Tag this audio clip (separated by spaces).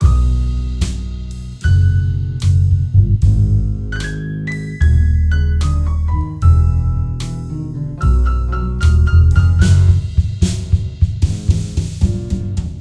game videogamemusic Jazz jazzy music